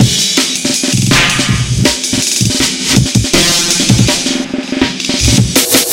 A breakbeat with FX 162bpm. programed using Reason 3.0 and Cut using Recycle 2.1.
break, beat, jungle, loop, dnb, amen, 162bpm